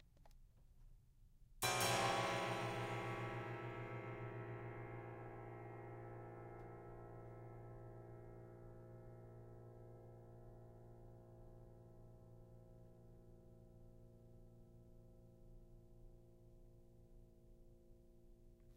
thumbtack strike on piano strings